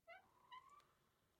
Creaking Wooden Door - 0003
The sound of a wooden door creaking as it is opened.